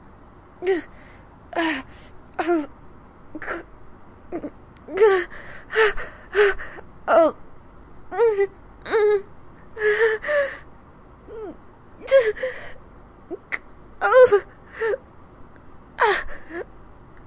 A woman making pained noises.